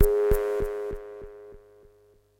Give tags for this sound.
100bpm
electronic
multi-sample
synth
waldorf

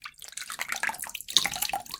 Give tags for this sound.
aquatic
crash
Drip
Dripping
Game
Movie
pour
wave
Wet

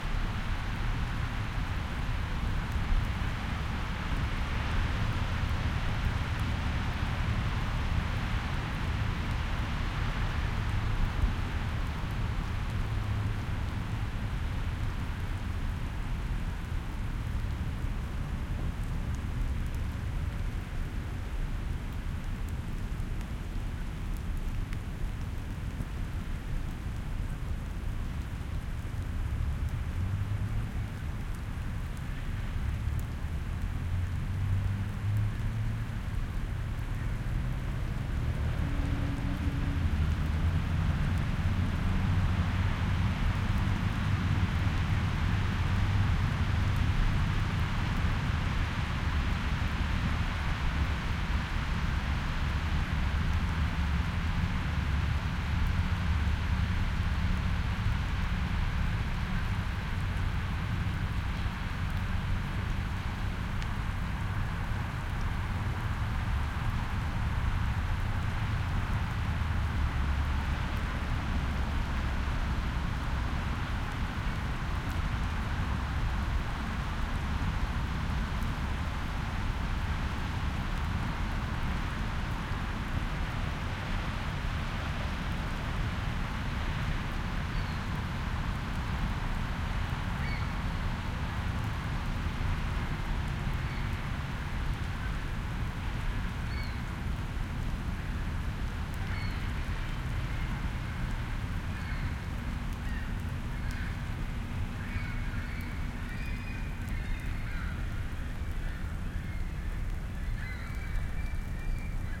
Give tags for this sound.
boulevard
traffic
wet
busy
wash
road
far
heavy

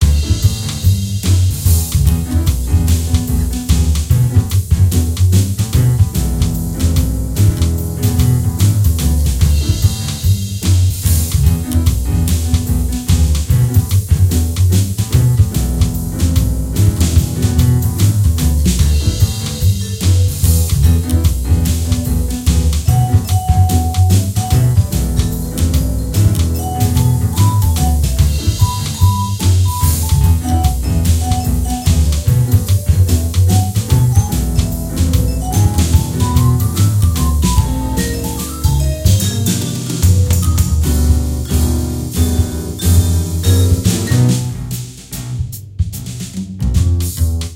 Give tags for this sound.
game
Jazz

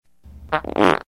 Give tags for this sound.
flatulation
poot
fart

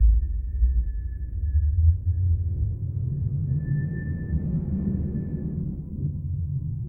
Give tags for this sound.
whistle
breath
soundeffect
wind
weird
noise
human
sound
recording
odd

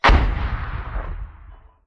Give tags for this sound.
military
indirect
report
mortar
explosion
M224
war
weapon

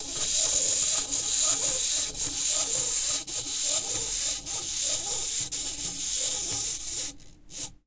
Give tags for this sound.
studio-recording
robot
toy
wind-up
unwiding

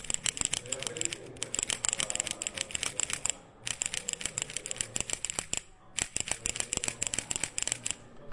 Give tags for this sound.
bike
mechanic
cycle
bell
metallic
bicycle
horn

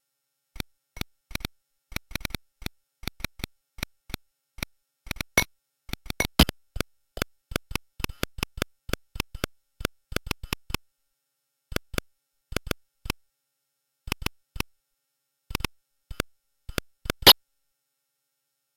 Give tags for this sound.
bent
circuit
circuitbent
glitch
lo-fi
lofi
spell

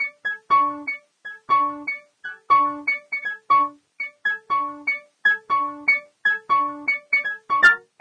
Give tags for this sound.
broken
lo-fi

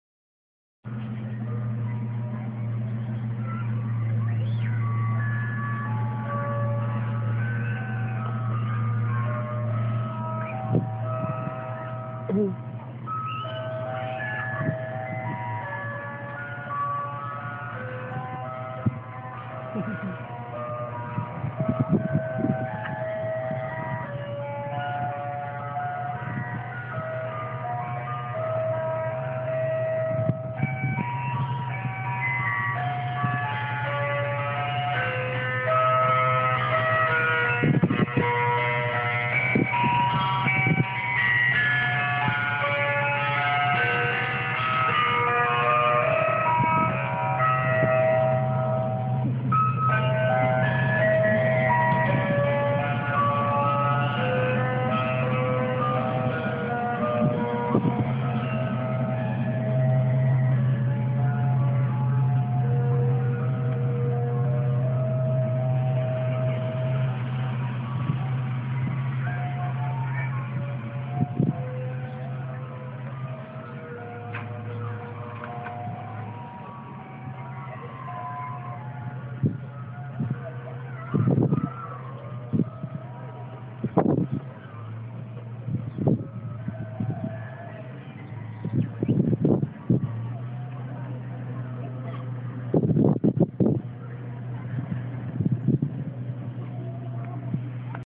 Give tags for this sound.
Greensleeves
childhood
childrens
cream
ice
memories
sounds
truck
van

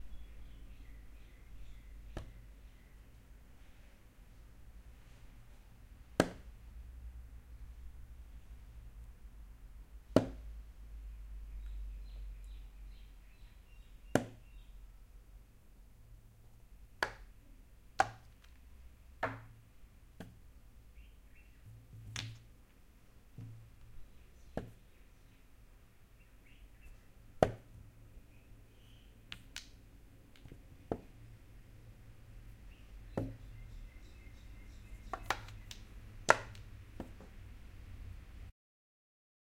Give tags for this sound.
chess
chessboard
movement
OWI
pieces

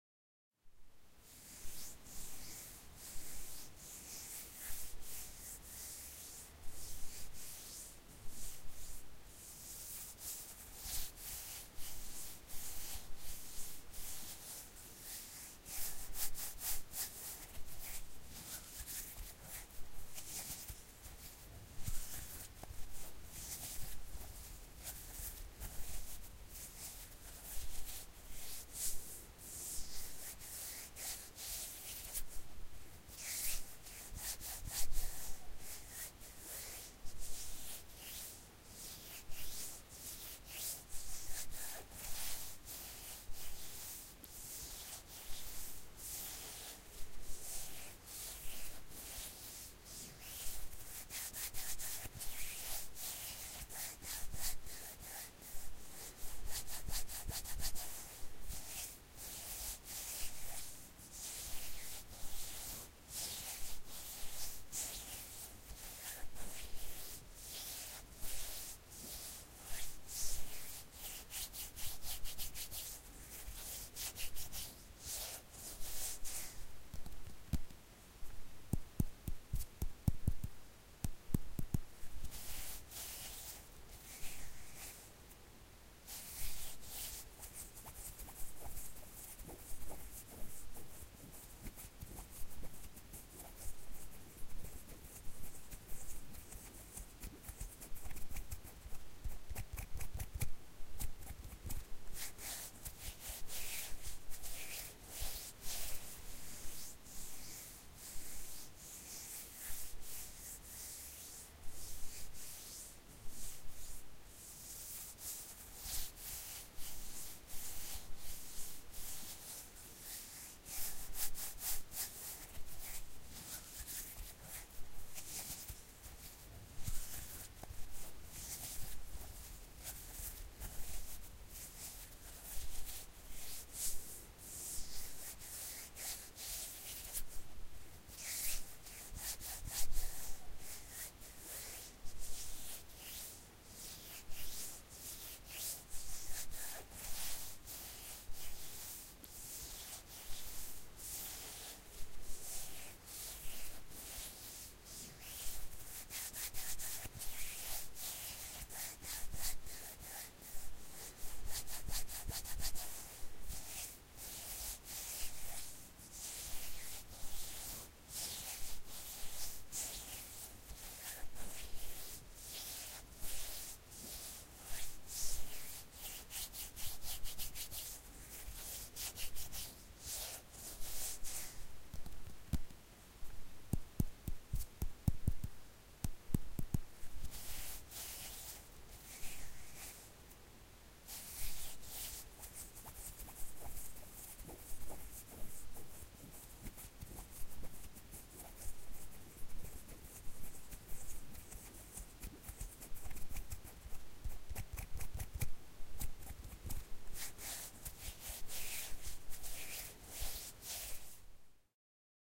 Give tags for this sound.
palms
rub

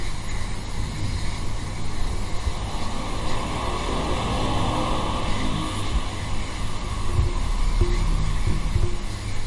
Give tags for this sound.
ambient
effect
field-recording
fx
noise
sample
sound

